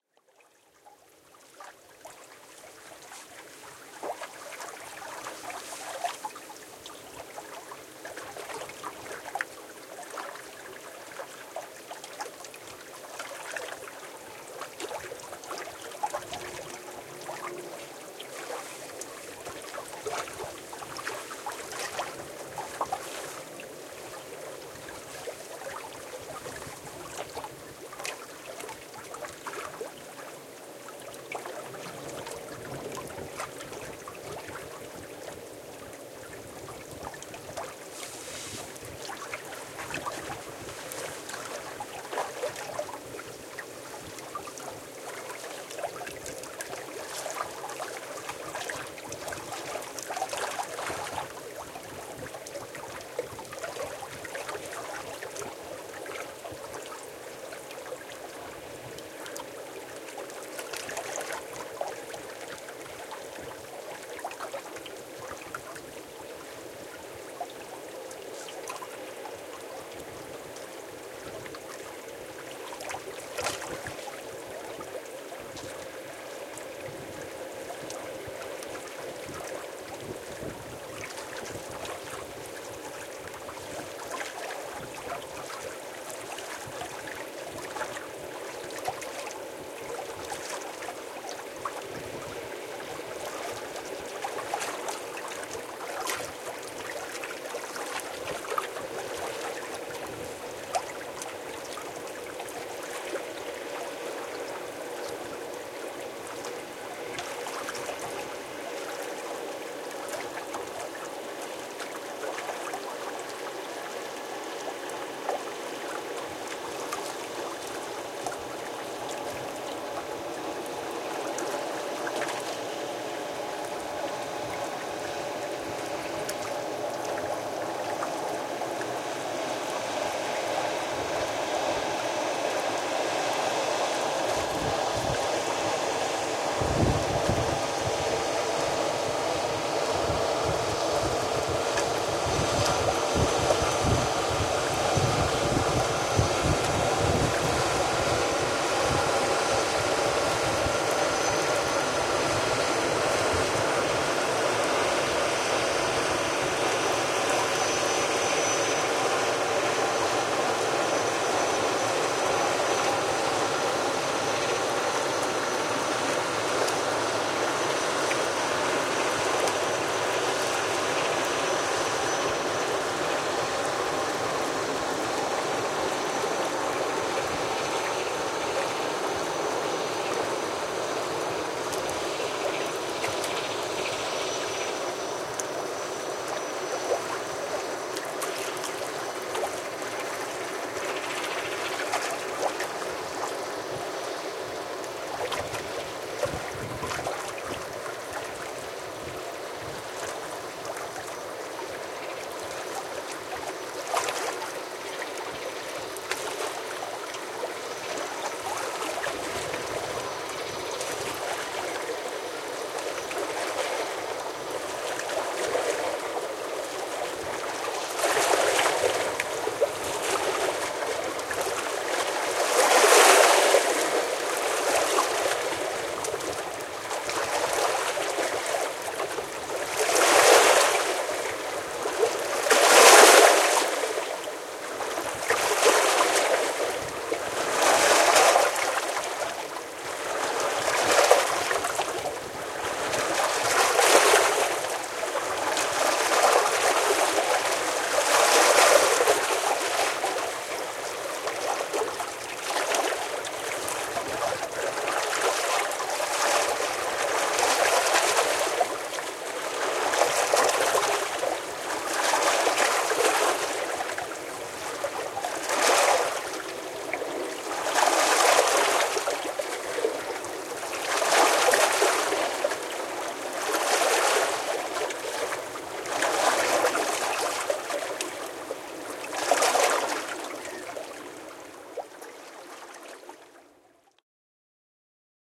LS100051-Elbe-boat-passing-EQ
Field-recording of the river Elbe, near Blankenese: a big boat passes by from the left to the right, making more waves hitting the shore.
Recorded with an Olympus LS-10, EQ-filtered (mainly low cut, high cut to reduce noise) in Logic Pro 9
field-recording, filmsoundhamburg, hamburg, river, water